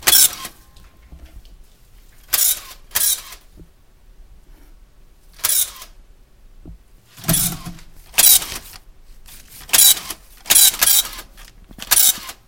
The sound of an electronic time stamp machine in my office. I looked all over for a sound of this & decided to just record my own. Then I thought, why not upload it so nobody else has to go through the same hassle...
office, equipment, stamper, electronics, stamp